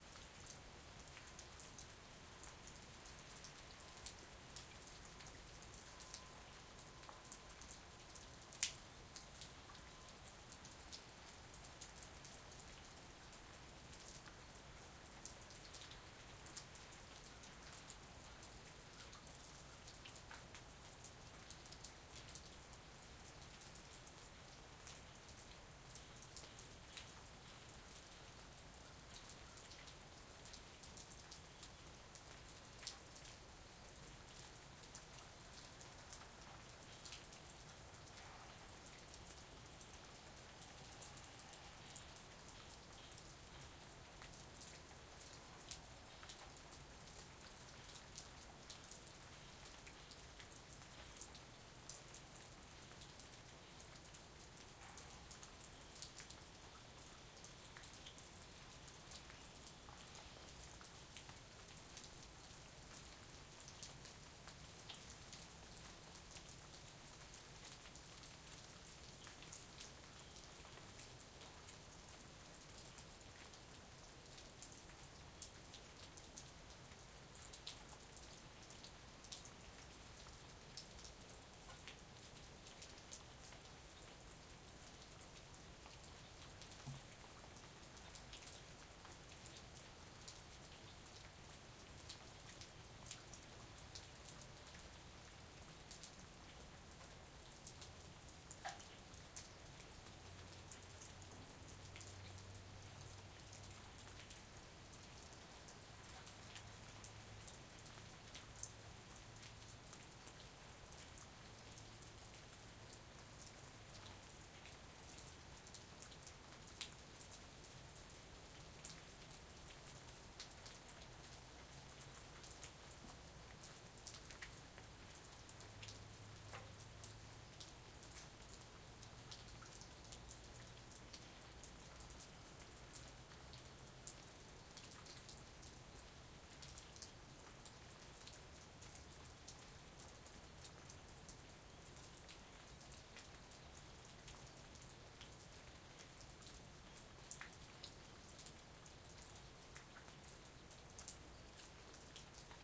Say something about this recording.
04 2020 light rain birds spring MS
Suburban Quebec, morning birdsong with rain distant traffic. Quad recording. This is the back MS of a H2 4ch.
spring, rain, birds, quad, field-recording, birdsong